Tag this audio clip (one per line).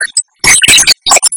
annoying
computer
damage
destroy
destruction
digital
error
extreme
file
glitch
hard
harsh
metalic
noise
noise-channel
noise-modulation
random
scratch
sound-design